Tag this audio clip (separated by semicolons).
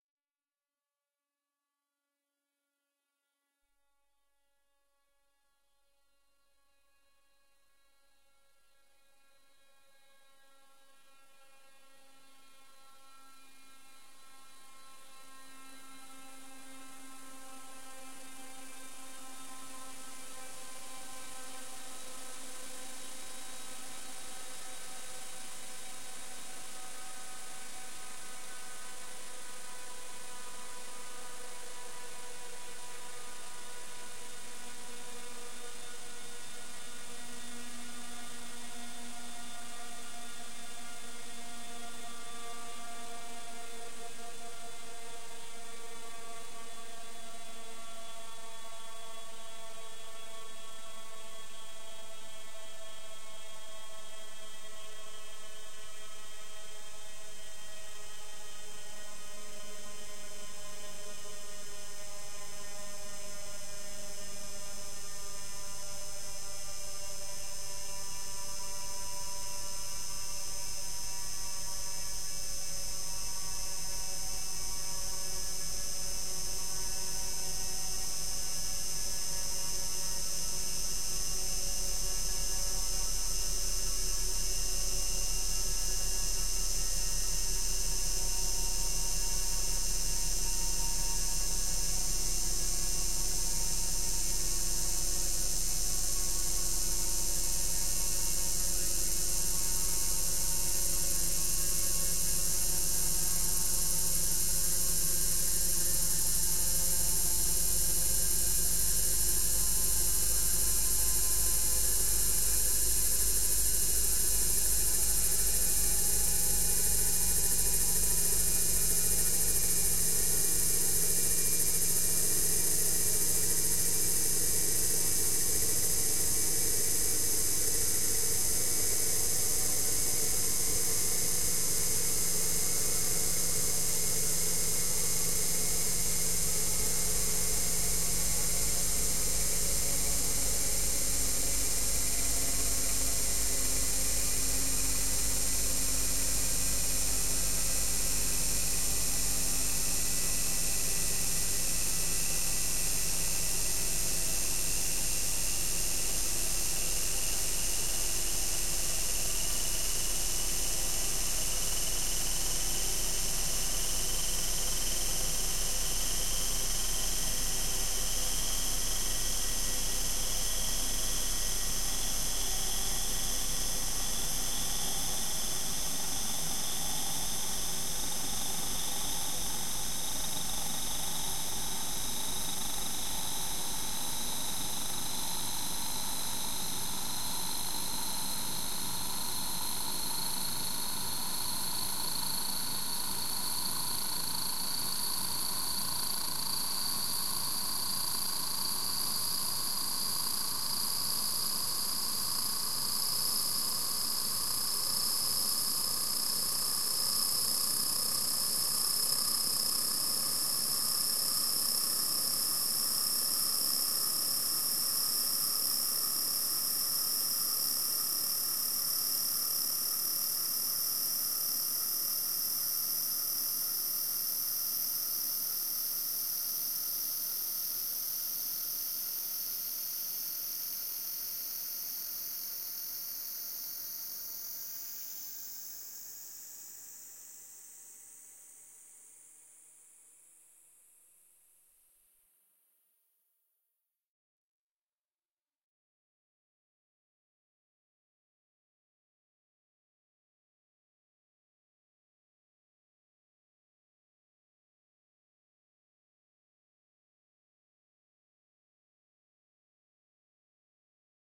Noise; AmbientPsychedelic